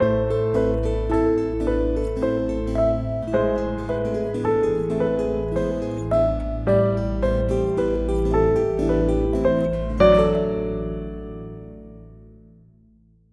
A Minor intro
Slow 4 bar A minor resolving to D maj, piano, synth and 2 guitars, fade out
intro, movie